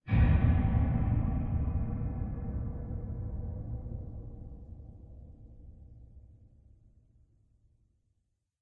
distant explosion
distant metallic explosion
huge, explosion, ambient, dark, metal, explode, industrial, hit, struck, horror, indoor, impact, metallic, strike, distant, drone